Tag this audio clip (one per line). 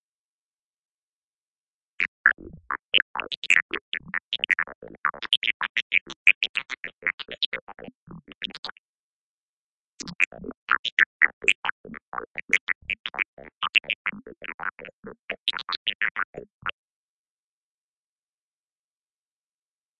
bleeps
fx
imaginary